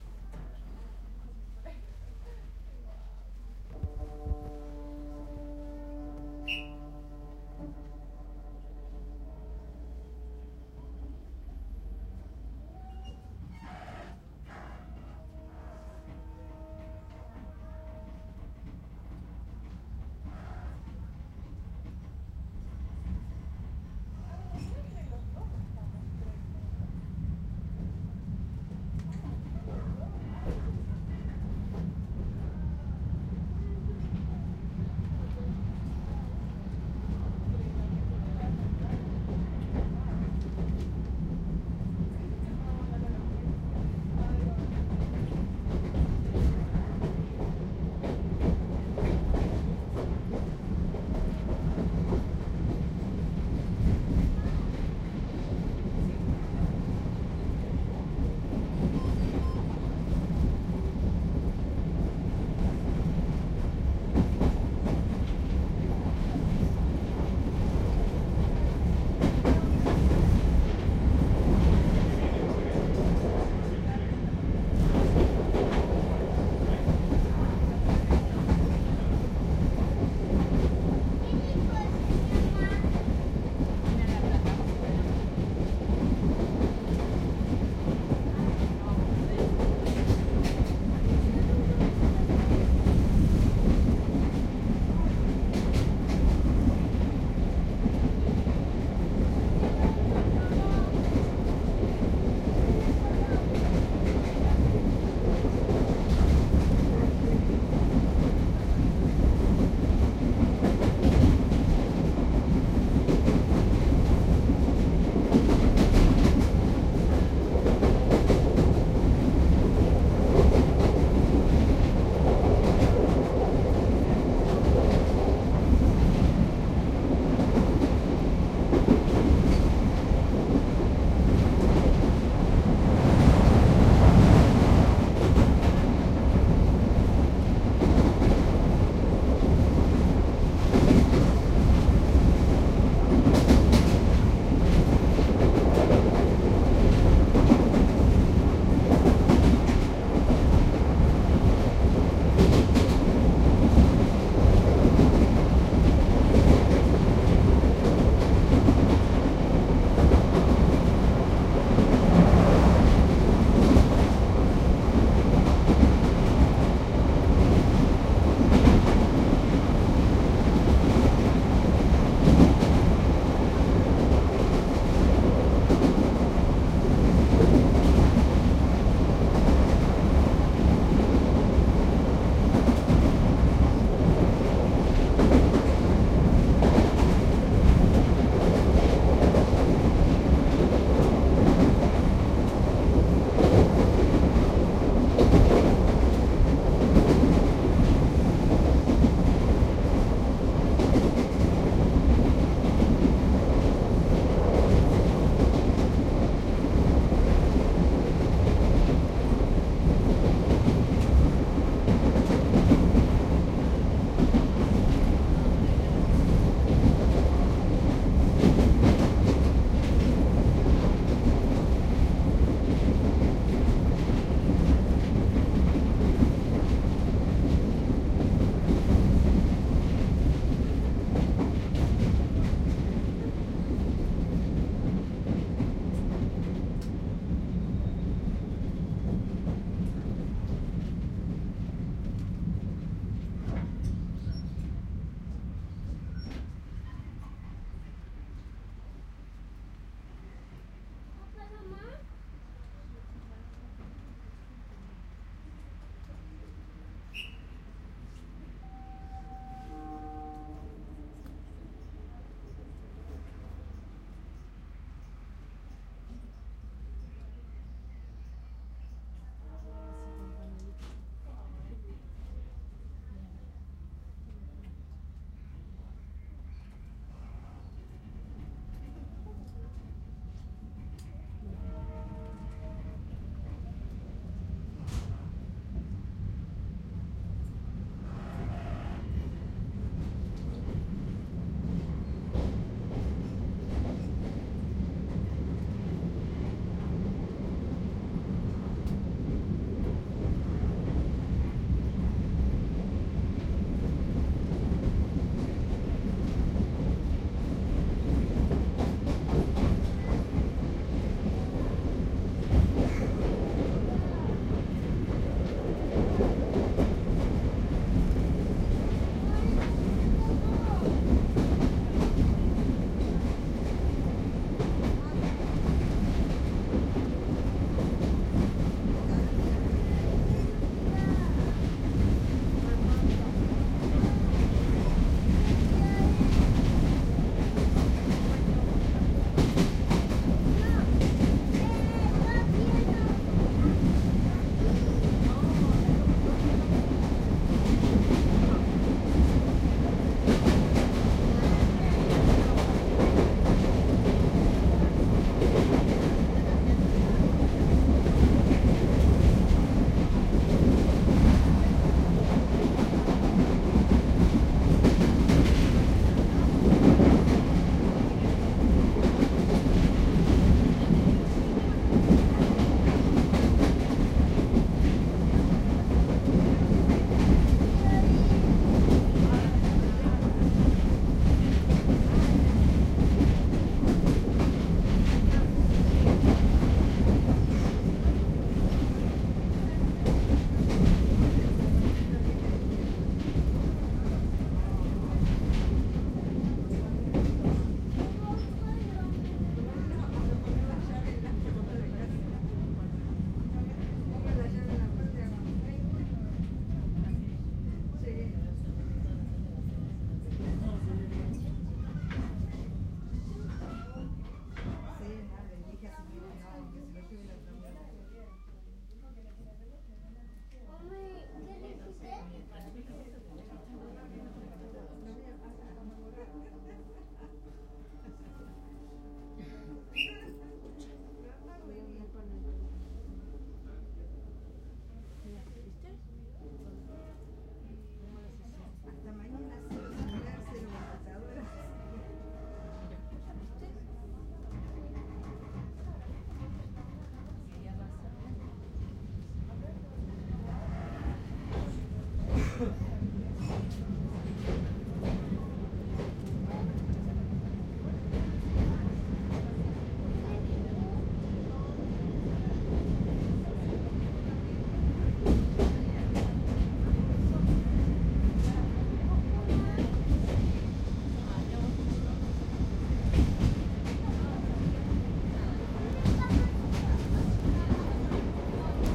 Ambiente Tren | Oil Train ambience
Ambiente desde la perspectiva interior. Se pueden escuchar voces de Argentina cuando el tren se detiene en la estación. Voices from Argentina can be heard as the train pulls into the station.
ambience, ambiente, tone, train, tren